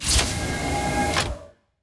SCI FI DOOR
Sound design that i made for a video game,layered a bunch of sounds and processed them to create an impactful sci-fi sound. this one is a spaceship door opening.
door, futuristic, fx, Sci-Fi, space, space-ship, unreal